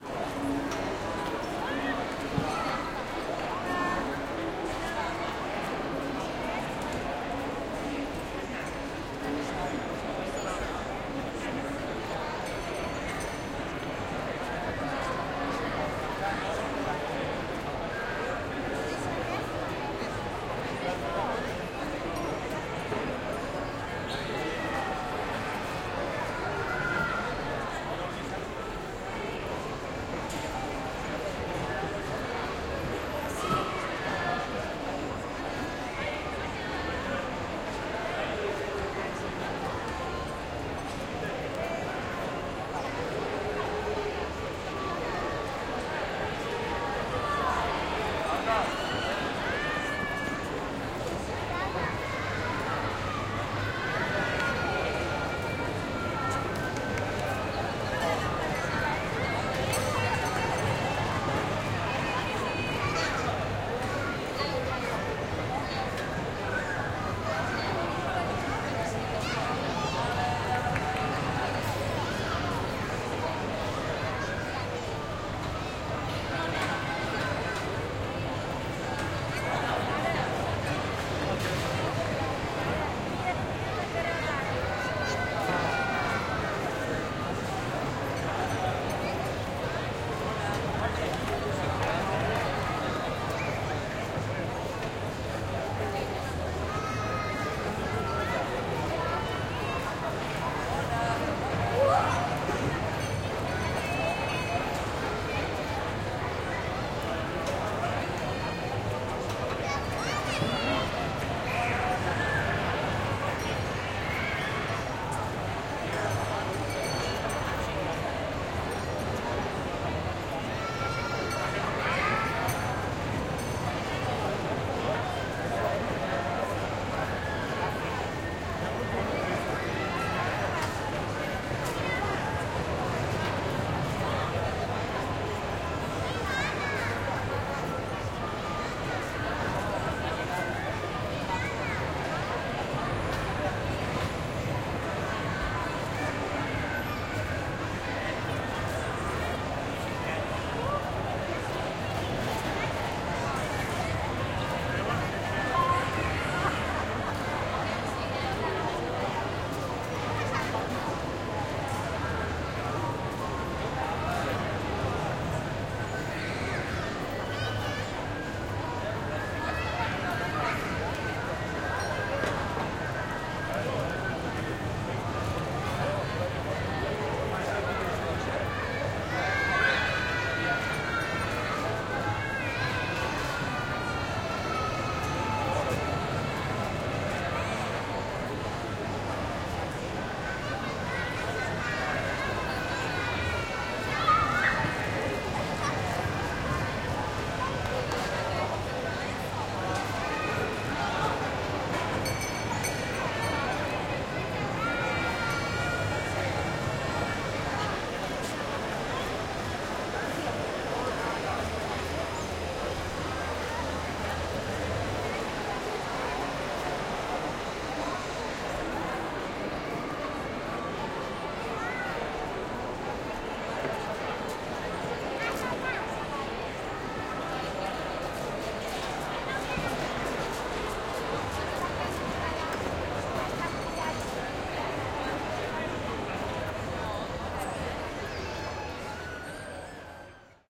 COMMERCIAL CENTER HERON CITY GENERAL AMBIENCE BARCELONA
Commercial Centre Heron City in Barcelona Catalunya
shopping, kids, people, playing, commercial, centre, ambience